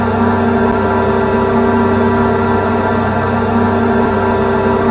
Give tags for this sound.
Background Everlasting Freeze Perpetual Soundscape Still

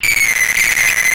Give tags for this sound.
beep broken crackling high-pitch lo-fi Mute-Synth unstable

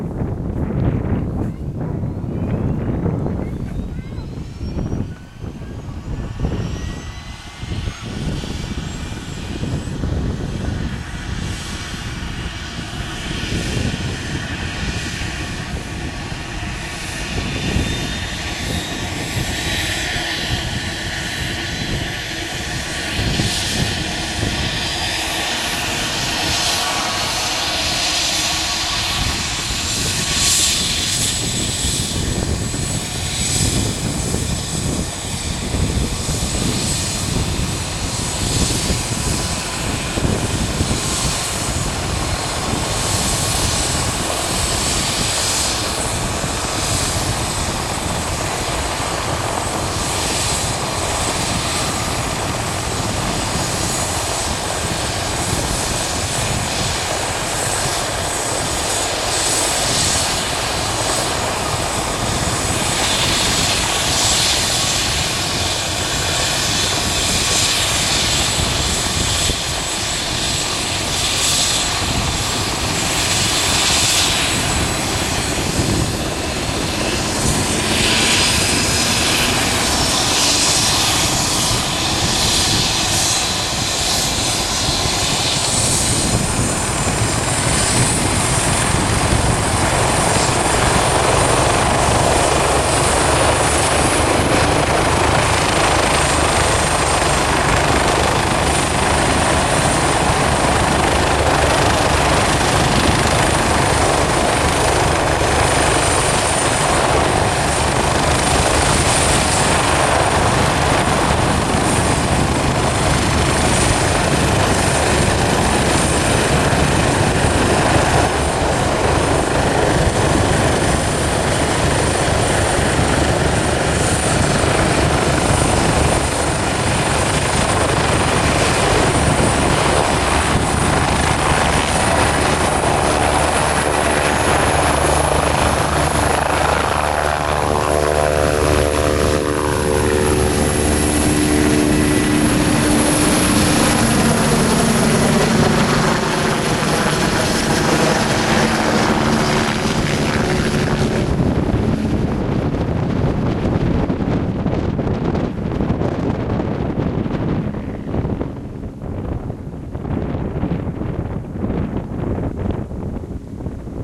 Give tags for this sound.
Eurocopter Rescue

